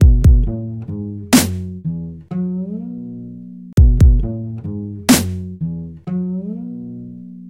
maiceo's cool beat
drum loop bass
studio, drum, one, beat